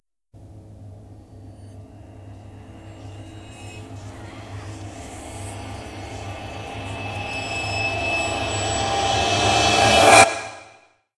a ten second build up sound
cymbal, build, tension, grow, reversed